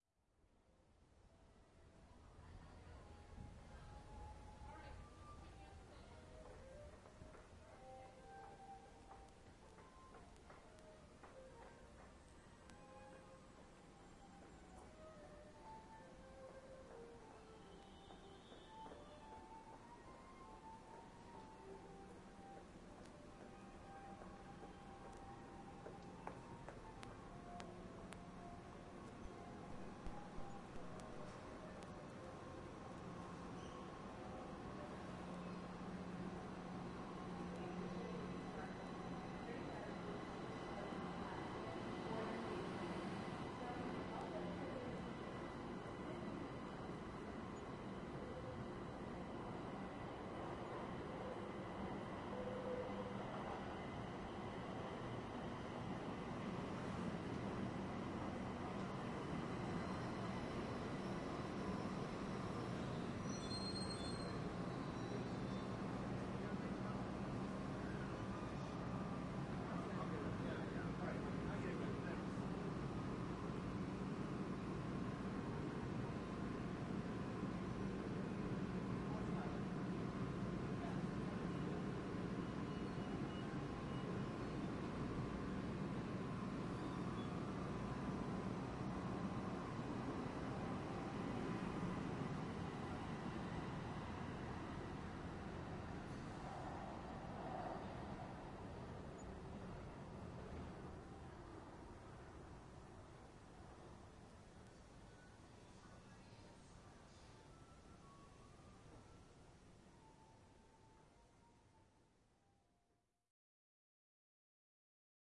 47 hn PittsTbinaural
Music on platform, train announcements, train arriving, train leaving, crowds. Recorded with home-made binaural microphone in the T Station, downtown Pittsburgh.